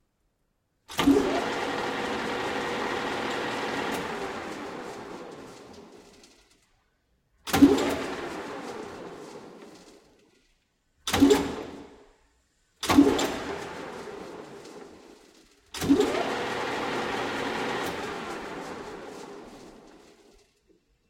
Large Clausing metal lathe.
Rode M3 > Marantz PMD661.